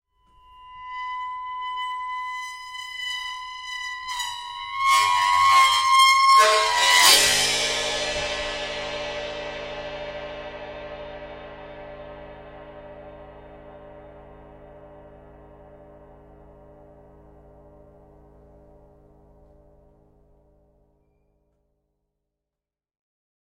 ride 22" squeak with drum stick
This sound is generated by holding the drum stick as a pencil and "writing" on a 22" zildjian ride cymbal, I love it.
flat, hi, squeak, rechinar, creak, terror, rechinido, squeaking, squeaky, sharp